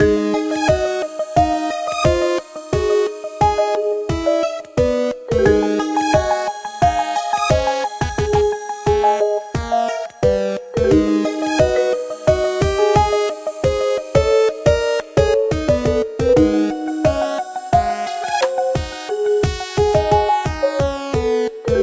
About 20 seconds of a looping background for a game, created in GarageBand.
If you download Audacity (for free) you can convert it to any other popular file format.
arcade-game, background, digital, electronic, game, loop